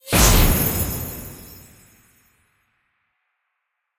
FUN-EXPLOSION06
A fun hit I used for several "instant" explosions in 2D animation.
explode, glitter, bam, gun, july, shot, boom, cracker, pop, bang, boo, explosive, xplode